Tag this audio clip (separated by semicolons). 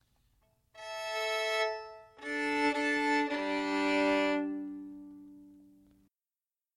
ASPMA; mono; violin